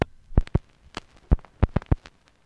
Clicks and pops recorded from a single LP record. I carved into the surface of the record with my keys, and then recorded the sound of the needle hitting the scratches. The resulting rhythms make nice loops (most but not all are in 4/4).
analog, glitch, loop, noise, record